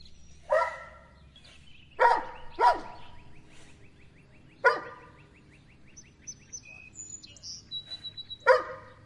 Dog Barks 01
A dog that woke me up early in the morning with its incessant barking! No, I don't know what breed this is.
dog, barking, bark, woof